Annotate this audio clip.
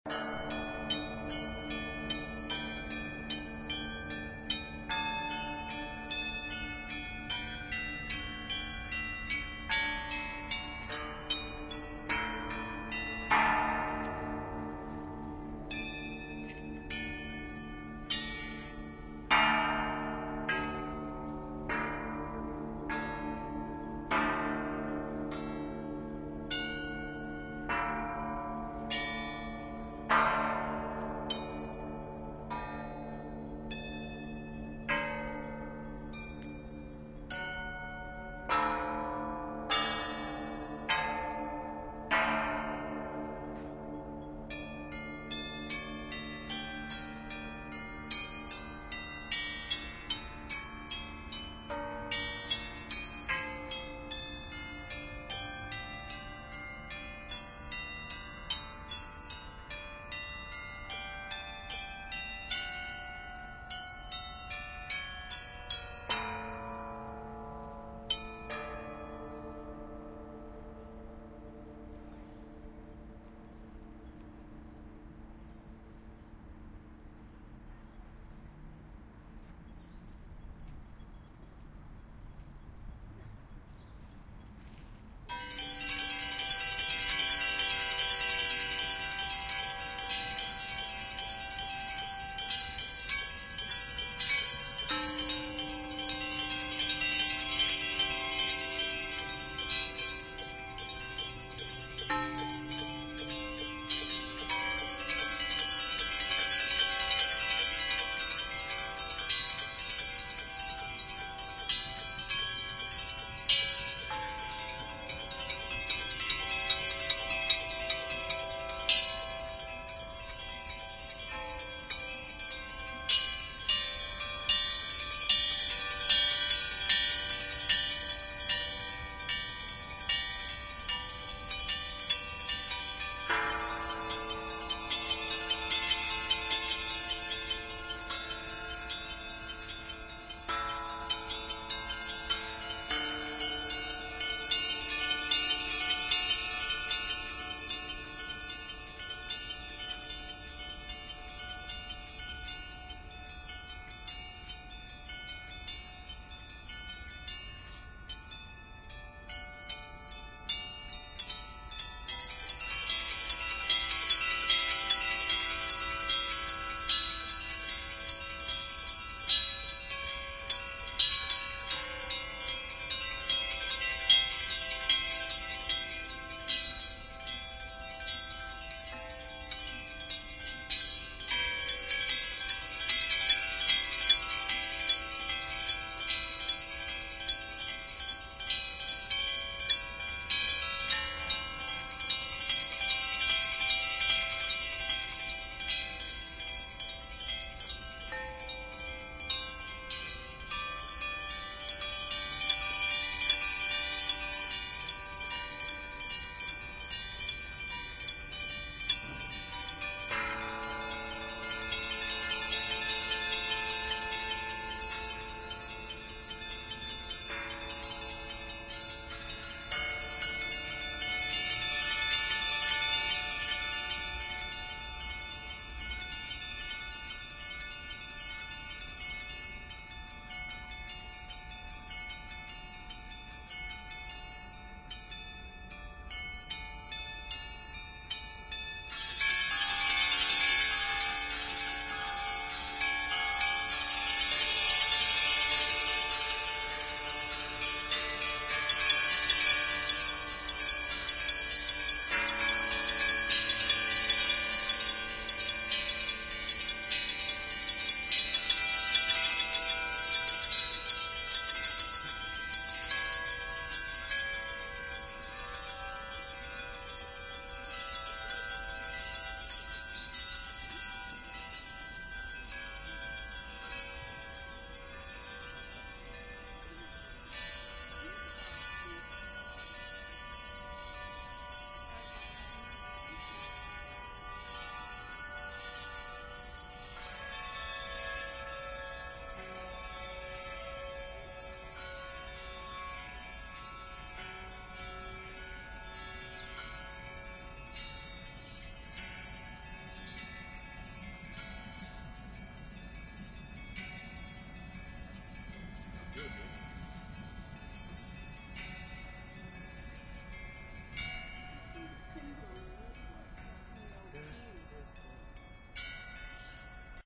A static audio installation of bells in Melbourne, Australia. People can go to the bells'website and "write" a composition to be played on these bells. They are only played at set times so not on continuously.
bells, Federation-Park